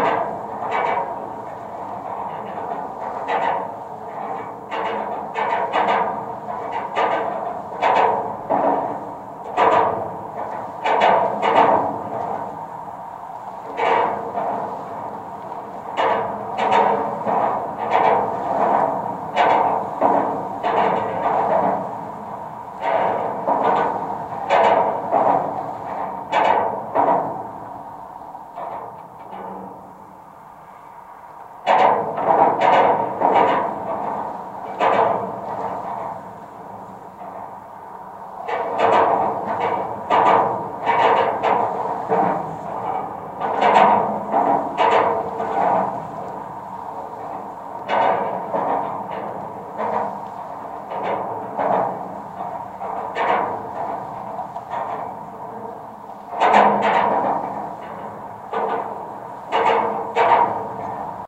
GGB A0226 tower NEN
Contact mic recording of the Golden Gate Bridge in San Francisco, CA, USA from the north surface of the east leg of the north tower. Recorded October 18, 2009 using a Sony PCM-D50 recorder with Schertler DYN-E-SET wired mic.
bridge, cable, contact, contact-mic, contact-microphone, DYN-E-SET, field-recording, Golden-Gate-Bridge, metal, microphone, Schertler, Sony-PCM-D50, steel, steel-plate, wikiGong